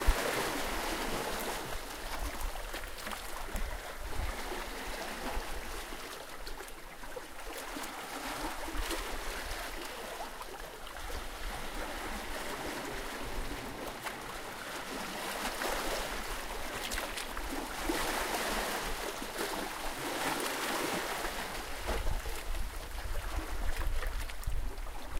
Waves at the beach.
beach, Scotland